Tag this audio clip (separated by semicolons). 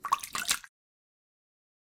Run; Running; River; Splash; Drip; bloop; crash; Movie; Lake; pour; wave; aqua; blop; Game; marine; Water; Sea; Slap; Wet; pouring; aquatic; Dripping